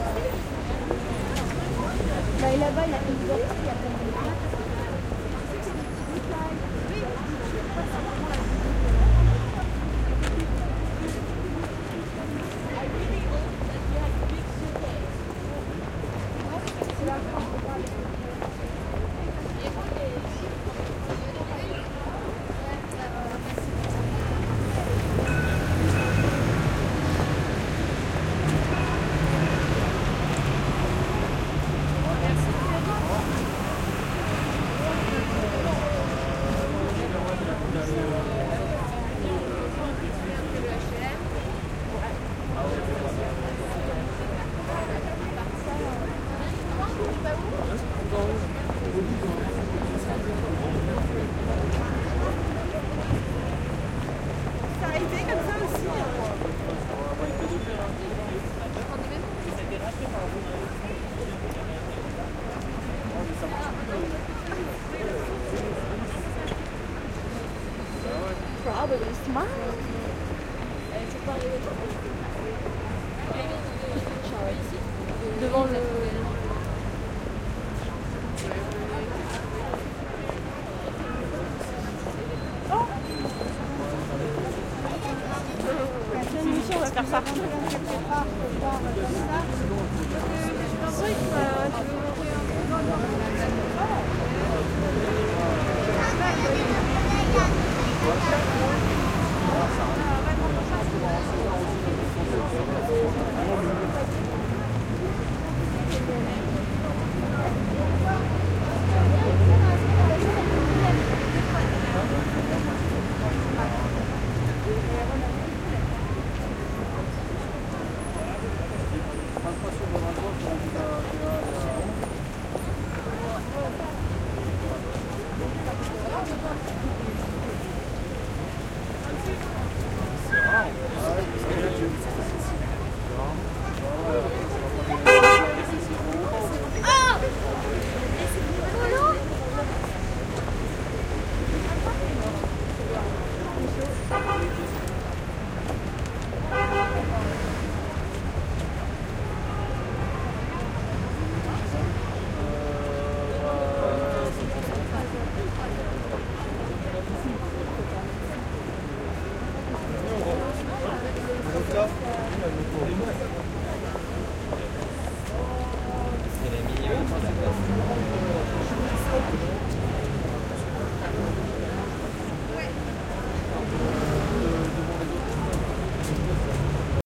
Rue Rivoli Paris
Rivoli street in Paris, afternoon, early automn 2007.
People are walking and talking on the pavement. Traffic all around.
Recorded with AB ORTF Schoeps
Recorded on Fostex FR2,
cars, city, crowd, field-recording, paris, people, street, tourist, town, traffic, urban